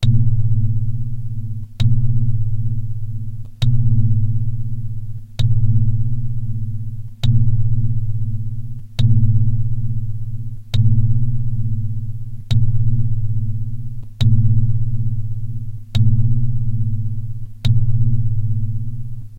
This sound is generated by an 80's synthesizer ensoniq sq1 plus which memory banks have gone bad. I recorded the sound because I thought that it would be excellent as a creepy sci-fi spaceship sound
This might be used as an alarm or siren